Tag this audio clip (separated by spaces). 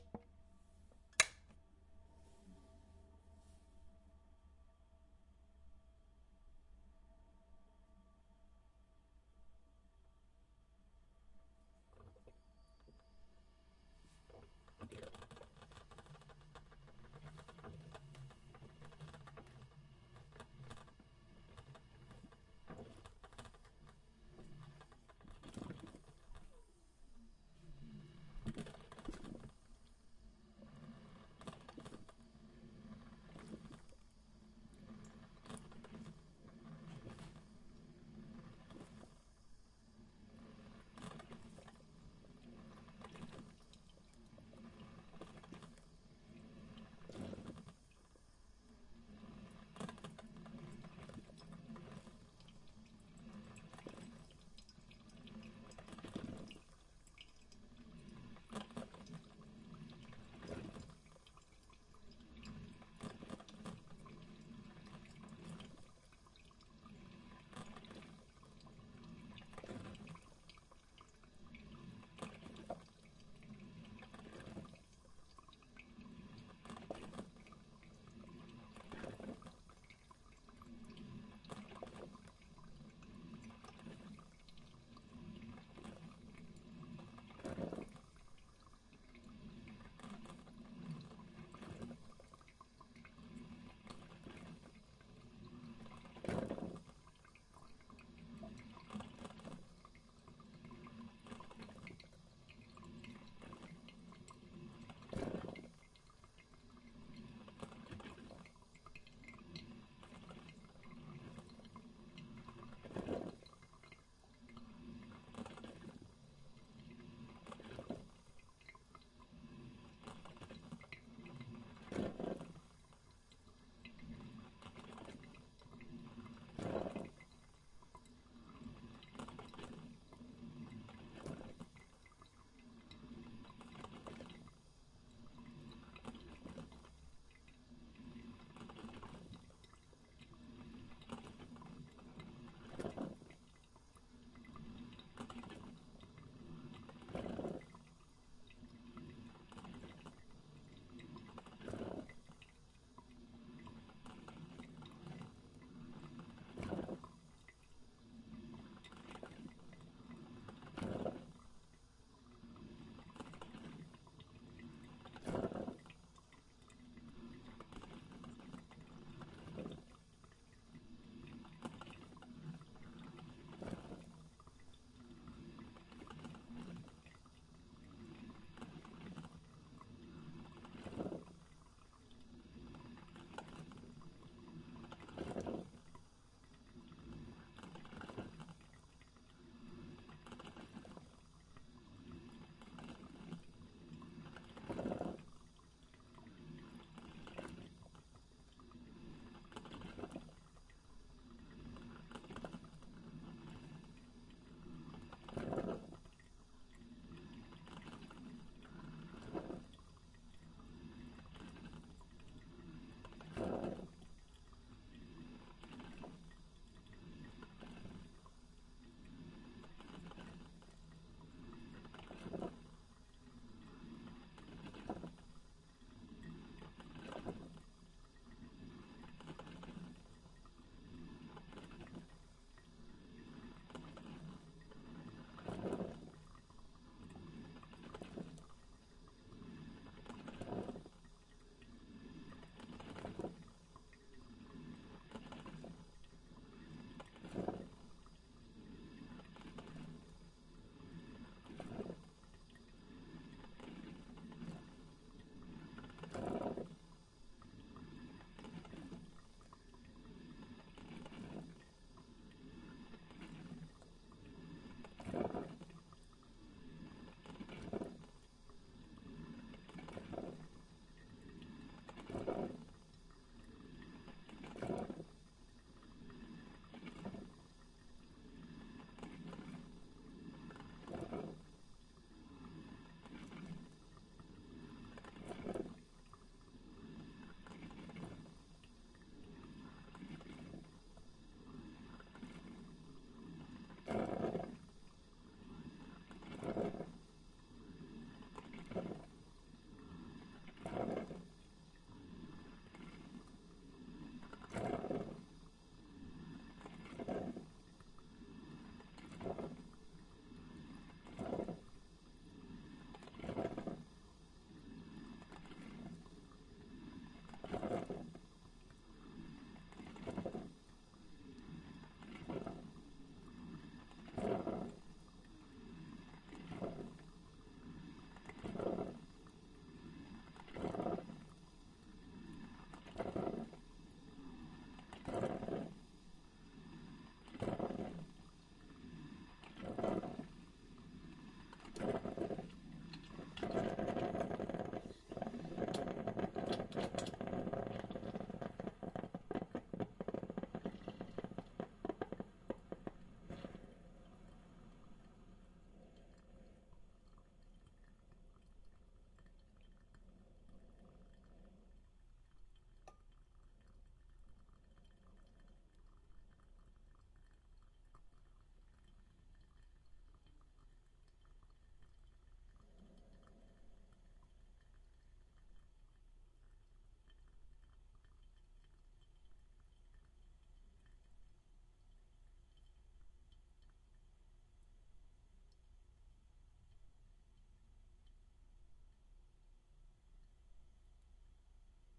Coffee Coffeepot brewing